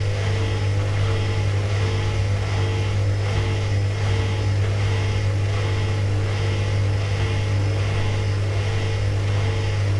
washing machine rinse